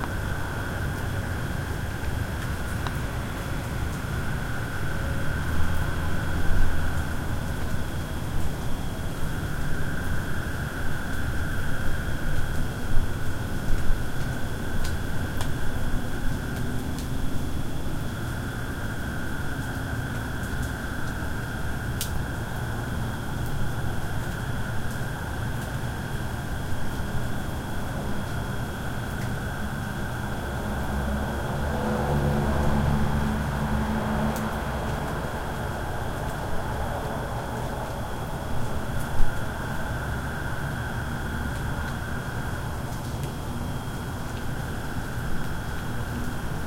ambience in my driveway 07182013 1
Ambience recorded in my driveway at night after heavy rains.